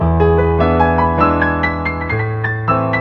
waltz op posth a minor 3
Short fragment of Chopin's A minor waltz recorded on Yamaha digital piano.
sample, digital, waltz, classical, yamaha, chopin, piano, frederic, music